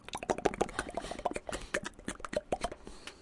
Quick flopping of a tongue outside a mouth. Done to make a scary effect in a nightmare where someone's starting to have white eyes and moves its tongue incontrollably.
Recording by Víctor González.
clapping, plop, Tongue, flicker, rapid, mouth